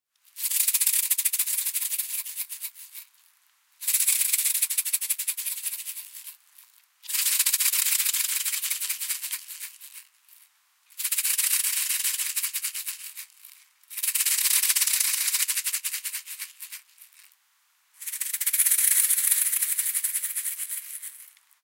Simple shaker ending but it is not created with a regular shaker but with two bottles filled with grains. Recorded with Zoom H1.
bottle, ending, grains, music, shaker